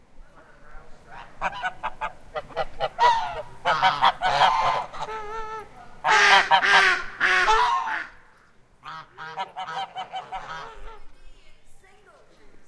cibolo geese09
Geese honking at Cibolo Creek Ranch in west Texas.
texas, geese, animal, honking